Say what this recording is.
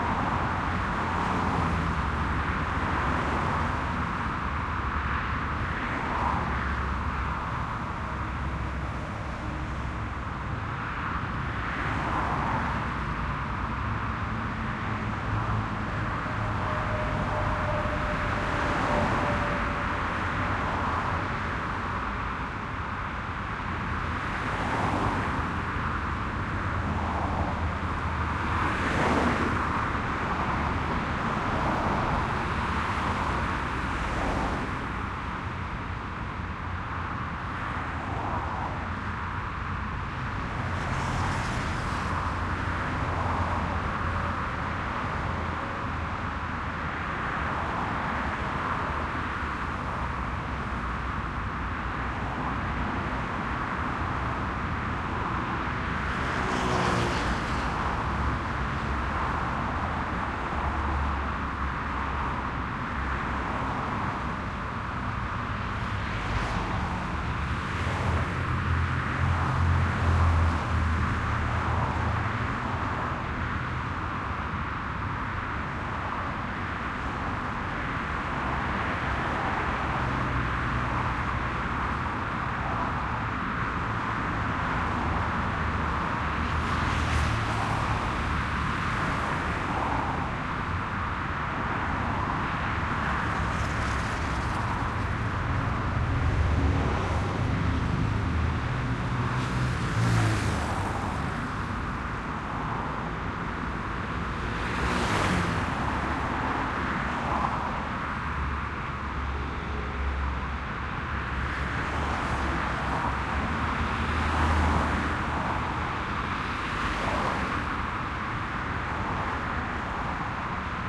City Highway Busy
Busy, City, Highway